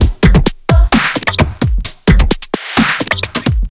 Hiphop/beats made with flstudio12/reaktor/omnisphere2
130bpm, trip